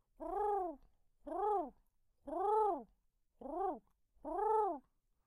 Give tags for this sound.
worldpeace 3naudio17 dove

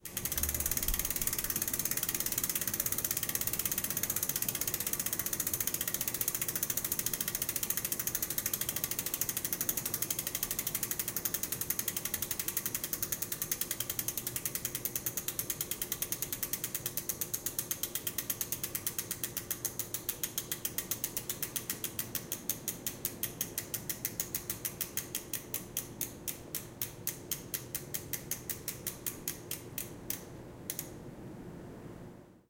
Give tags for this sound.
spinning speed wheel

